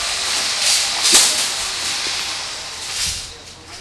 Someone trims a Christmas tree as I walk past into the grocery store with the DS-40 recording.
store, field-recording, saw, tree, trimmer